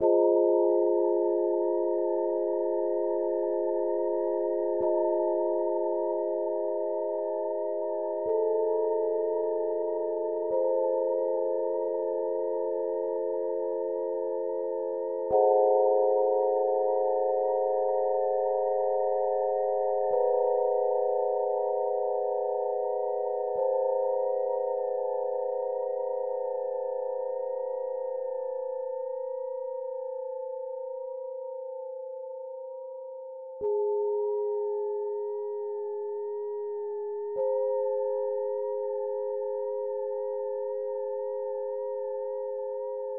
Piano test 1
Piano used in LMMS addzynsubfx,,just experimenting
Ambiance, Ambient, Lmms, Loop, Piano, Synth